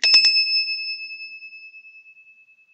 A ghantee is a devotional instrument. Its chime is produced by shaking the stem attached to the bell.
bell, ghantee, hand, worshiping